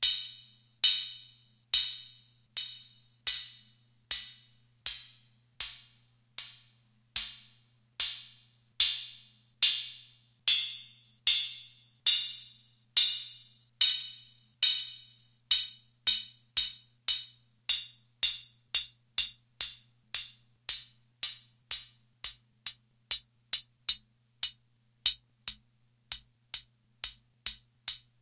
HH Closed
1983 Atlantex MPC analog Drum Machine close hi hat sounds
1983, analog, closed, drum, hihat, mpc